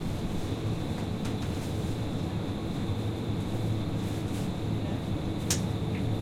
Sound of a fridge
freeze, freezer, fridge, refridgerator